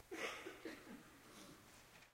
Cough Snuffle Distant 2
Recorded with a black Sony IC voice recorder.
distant, faint, human, snuffle, sound